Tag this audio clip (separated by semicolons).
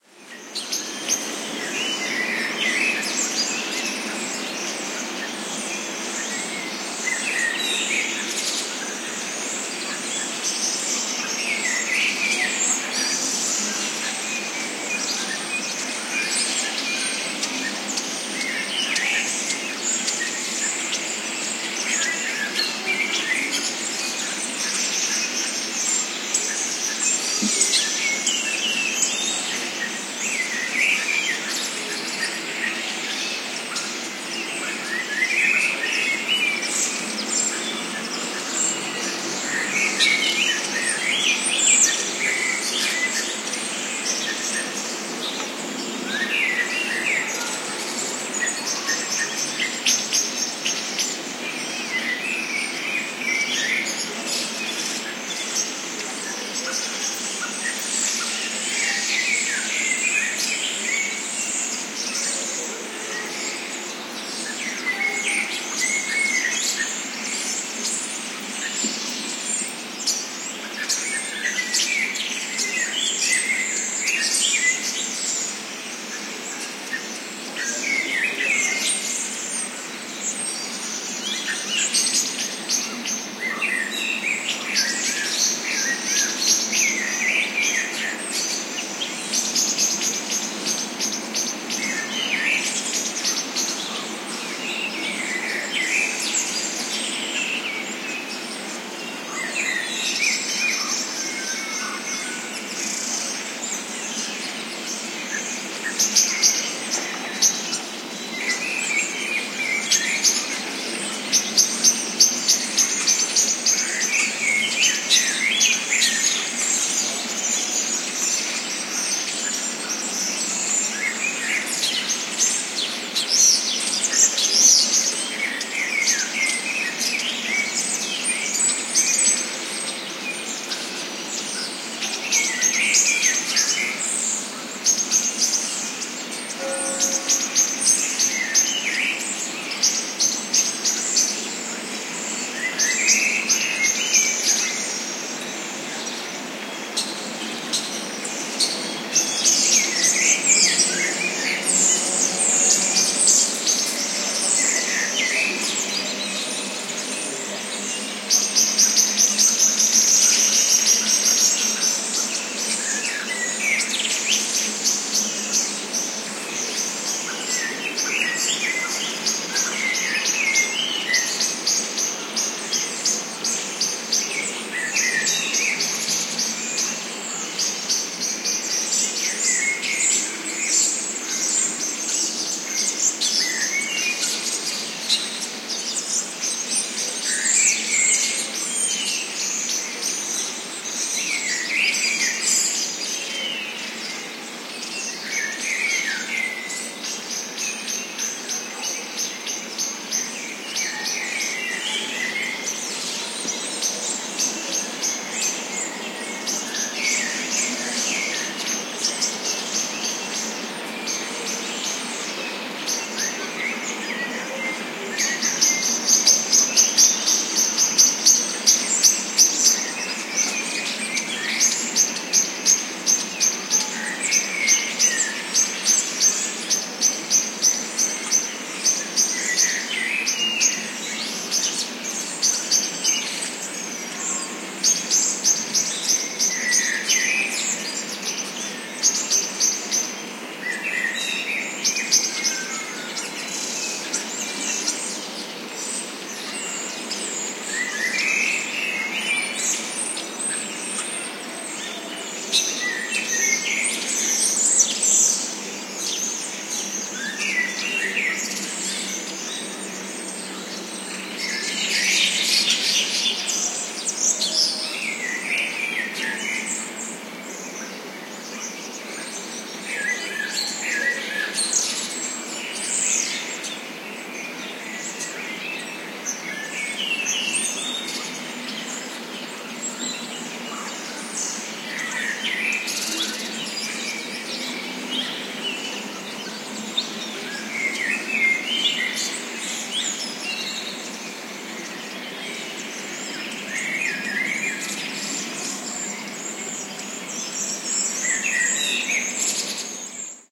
ambiance; birds; city; field-recording; singing; summer